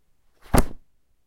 Book Close - 15
Closing an open book